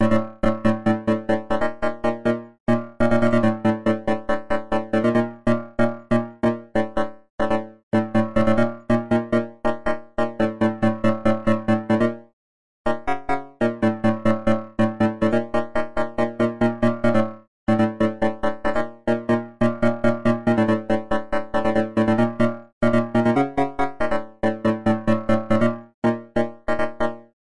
Synth Lead with Arp
I offer a beautiful synth created purely for the style of electro! This loop is a mixture of bass and punctuated lead by arp. For Psy Trance 145BPM.
trance, lead, psy, Arp, loop, Synth